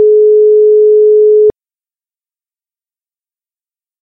dialing tone europe
Dialing tone on european (excepting UK) telephony. Made with Audacity.